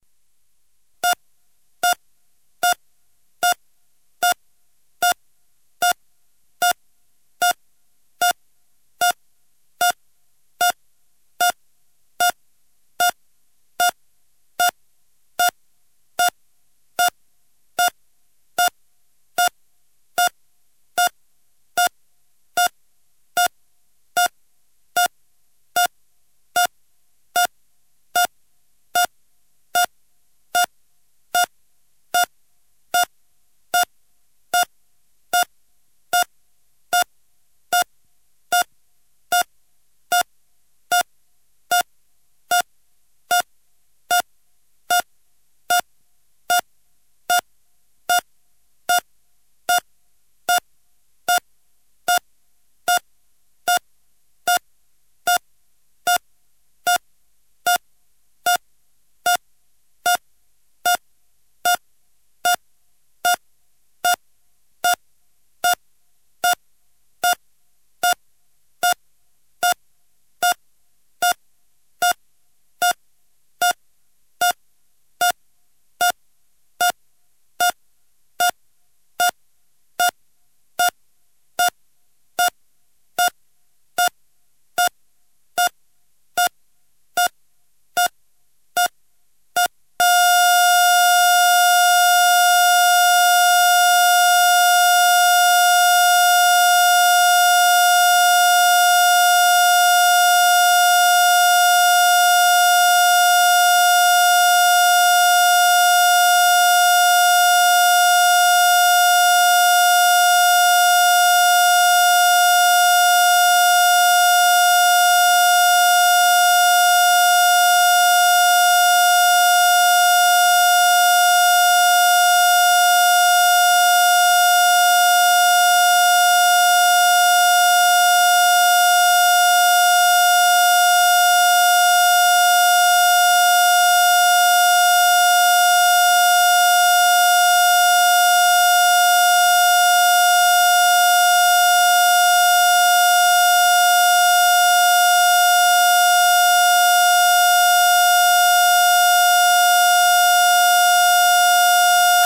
Steady Heart Monitor To Flatline
A dual mono recording of a steady heartbeat monitor that turns to a flatline. Created with Adobe Audition using a single beep from a recording made in a hospital.
oximeter, heartbeat, heartbeat-monitor, electronic, beep, monitor, heart-monitor, flatline, heart